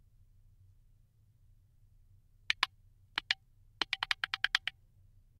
Sound of wooden musical spoons being clapped; recorded in the LAB sound booth at Confederation College.
Sound has a clunky feel to it, as well as starts slowly and progressively gets faster.
clap, musical-spoons